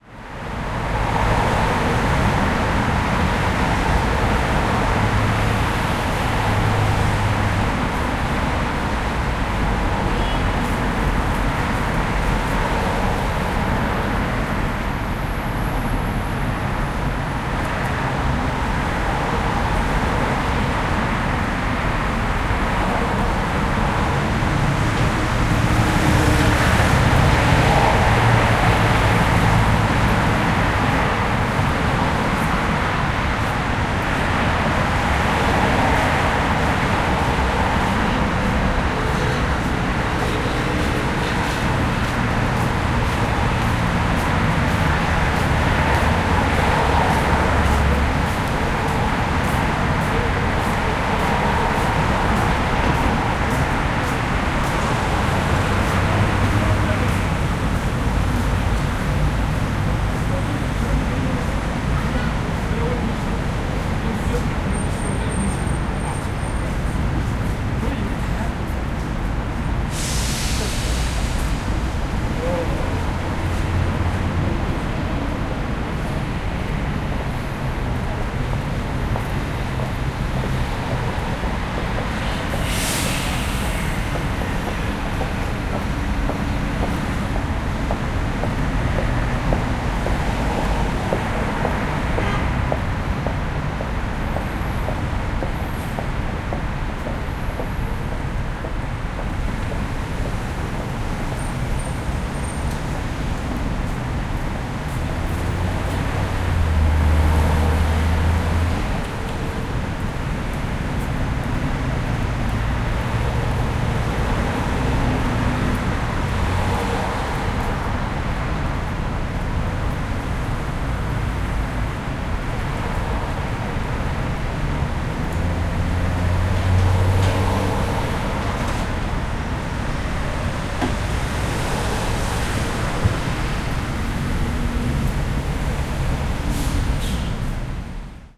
0268 Yeoksam on sunday
Traffic and people walking.
20120527